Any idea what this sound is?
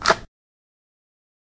A S&W; 9mm Being drawn from a holster.
Gun, Pistol, Holster